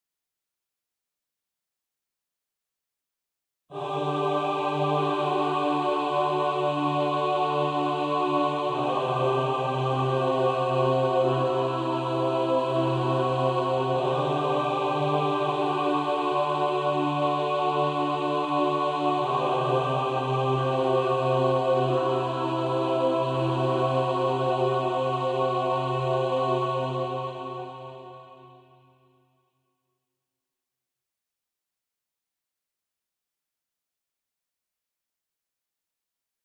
These sounds are made with vst instruments by Hörspiel-Werkstatt Bad Hersfeld